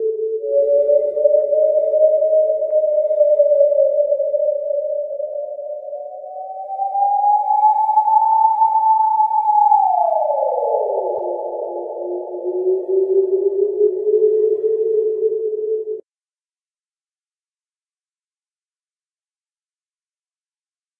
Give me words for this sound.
deserted place but inhabited!
outerspace, space-ships, sf, alien